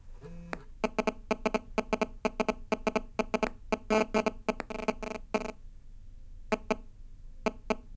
MobilePhone MagneticInt PartI
First section of an Iphone 4 cycling while attached to a dock, (some kind of unshielded Sony alarm/personal stereo). Recorded with Edirol R-05. Some distortion. Unprocessed. Hotel room ambience. Full version is posted as well.
magnetic-interference, mobile-phone, interference, magnetic, cell, mobile